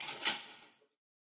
click clack
it is emerged by someone who play a guitar.